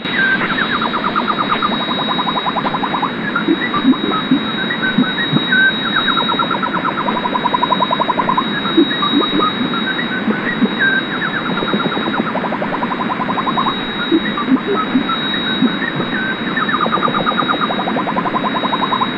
mystery jammer
Imported into Audacity, amplified and compressed. Otherwise original.
radio, jammer, shortwave